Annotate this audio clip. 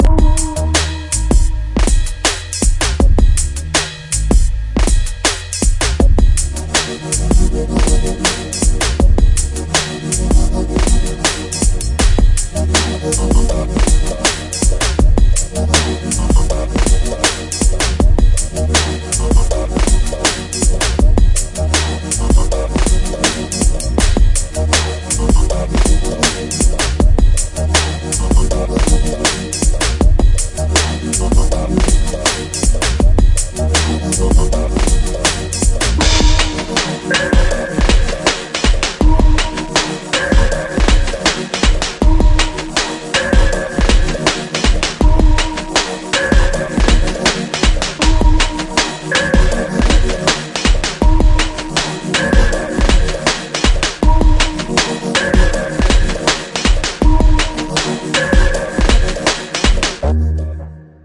Groovy downtempo loop with chilly electronic atmosphere
electronic, downtempo, loop, atmospheric, 80bpm, beat